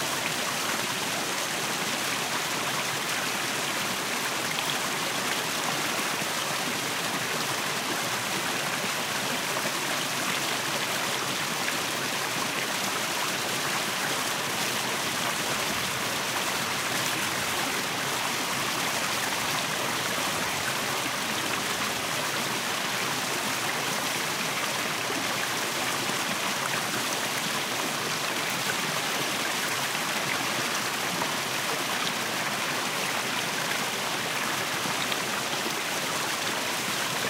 A brook near my home.
I like it’s sound but not easy to record.
But here I try again with my Zomm F4 and Sennheisser MKE 600.
I did some adjustments with an equalizer and I'm happy with resault so far. But I'm not completely convinced, maybe I'll try again sometime later :
Zomm F4 and Sennheisser MKE 600